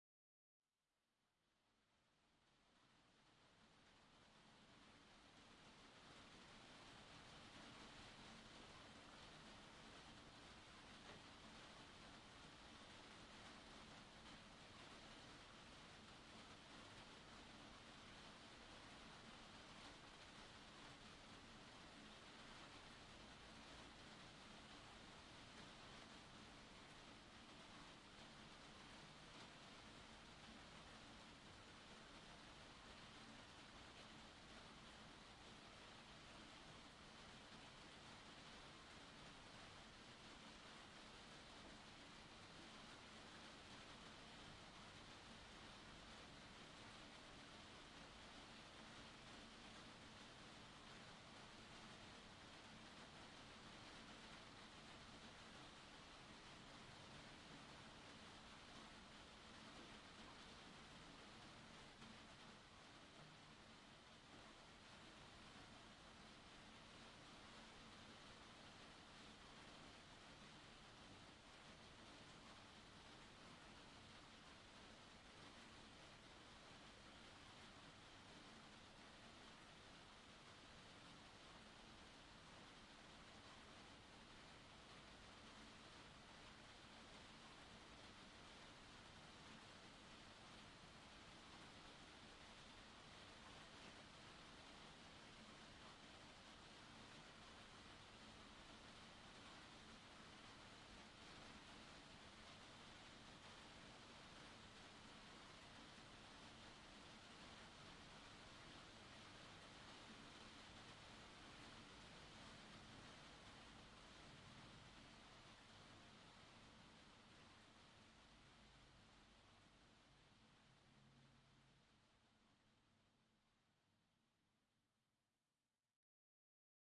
Household - Rain on Conservatory Roof
Light rain on glass conservatory roof.
glass, light-rain, Rain, weather